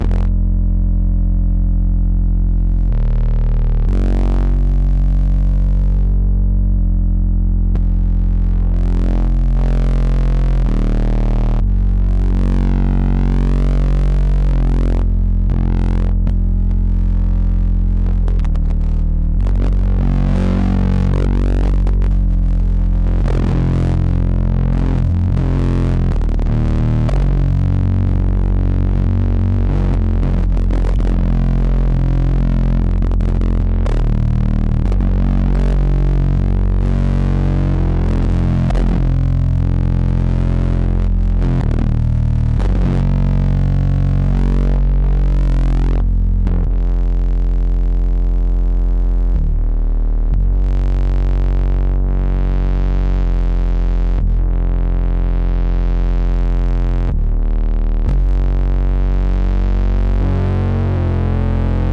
Bass Loop
124 BPM
Key of F Minor